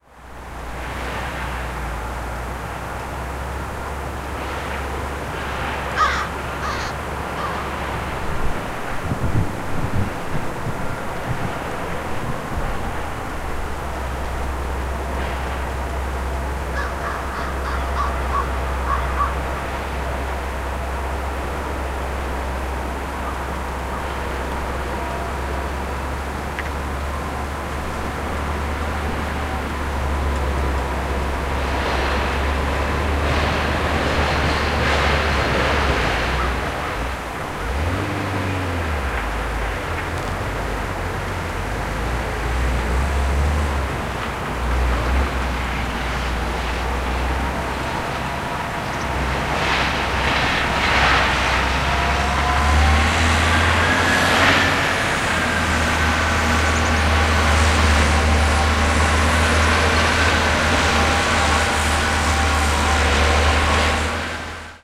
Ottawa winter soundscape
Field-recording for Kadenze course Introduction to Sound and Acoustic Sketching. Trimmed, normalized and faded in and out. Also slightly low-shelf filtered to remove excessive wind noise. Recording made in city park in Ottawa, Feb. 17, 2017. Sounds include bird calls and snow-removal equipment.
ambience, field-recording, kadenze, soundscape, urban, winter